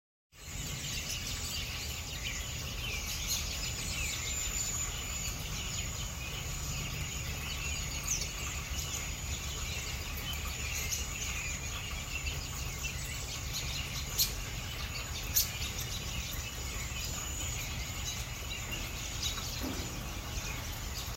A huge flock of robins swarming trees filled with berries in NE Florida, December 2019
Field-recording, birds, robins